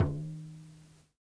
Tape Hand Drum 11
Jordan-Mills, collab-2, drum, hand, lo-fi, lofi, mojomills, tape, vintage